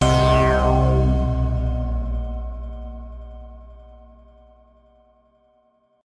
A loud, sudden haunting chord with a metal hit
creepy, digital, haunted, horror, metal, scary, spooky, sting, surprise, suspense, synth, thrill